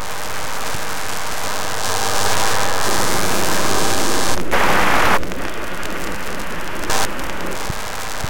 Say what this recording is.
no field-recordings used here, but sounds like a swarm of bees fighting the wind; done with Native Instruments Reaktor and Adobe Audition
2-bar, bees, dark, drone, electronic, industrial, loop, processed, sound-design, swarm, wind